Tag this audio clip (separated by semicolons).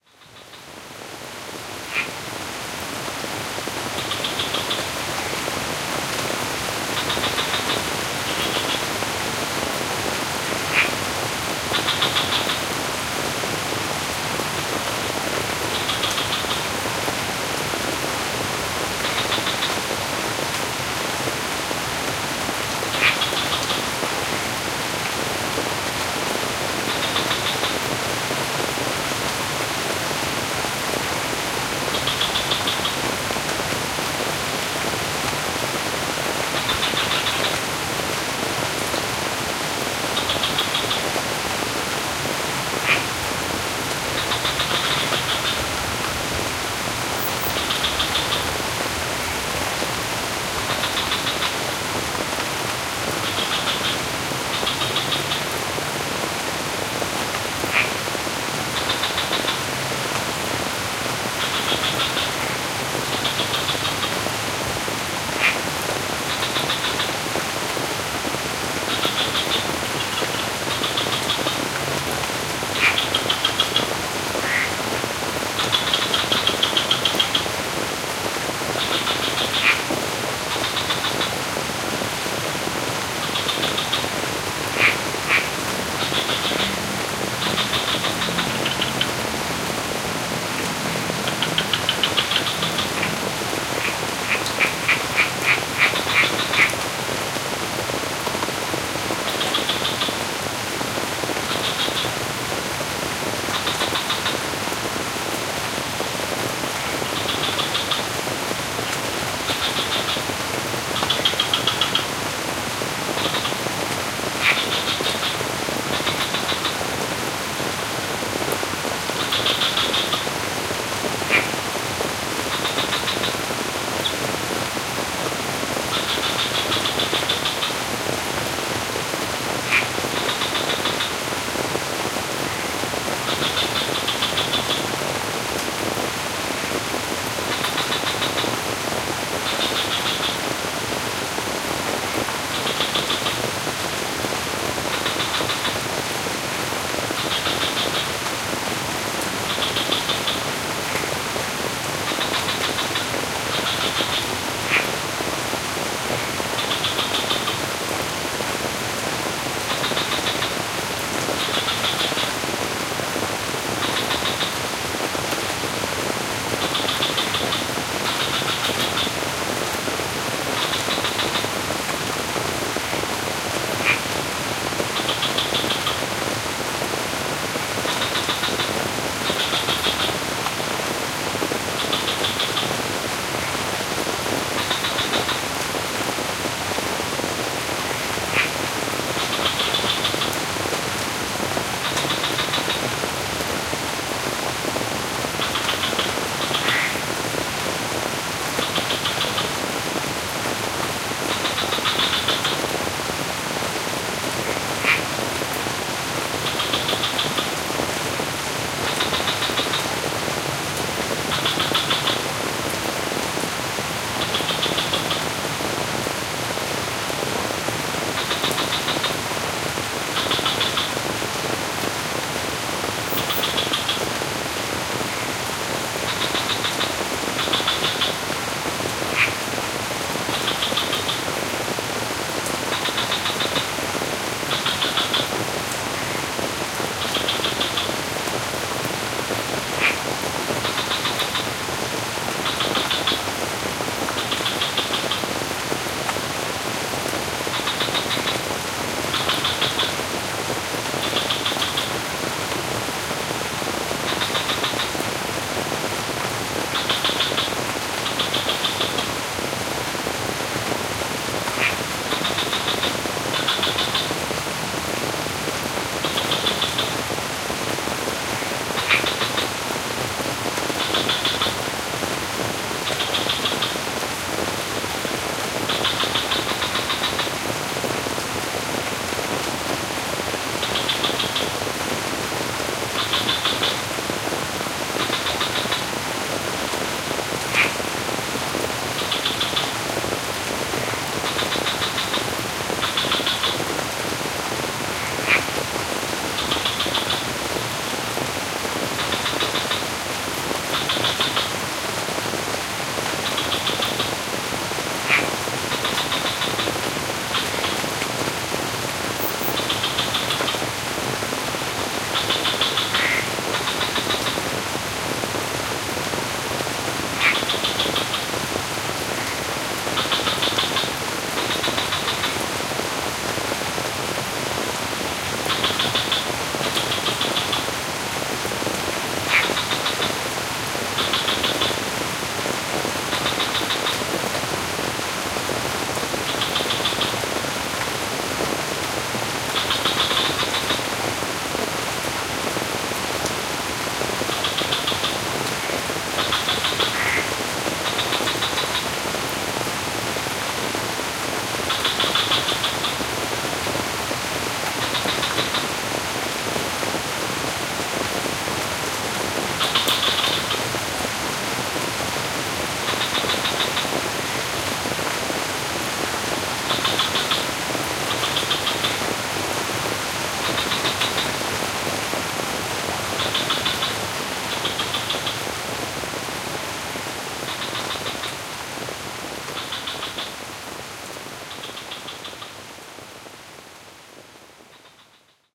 raindrop; Ubud; ambient; terrace; tropics; paddy; frog; Bali; tropical; shower; Indonesia; rice; night; Asia; peaceful; rain; meditation